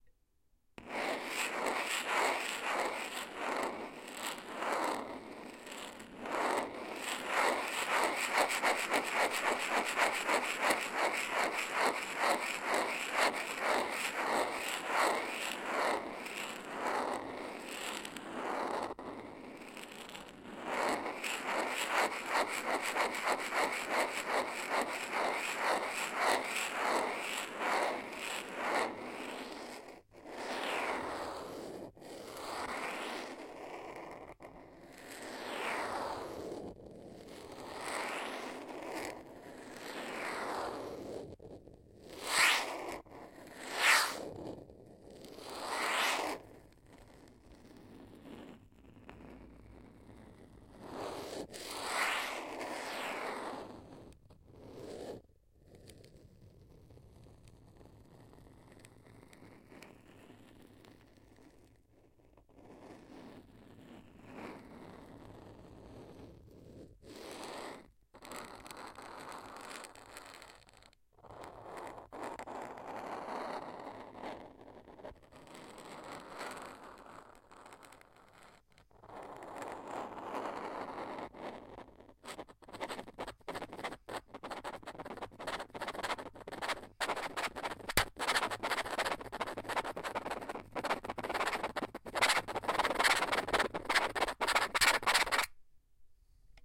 This is the sound of me lightly Scraping a Stanley Knife on a Macbook Pro.
Recorded on a Tascam DR-40.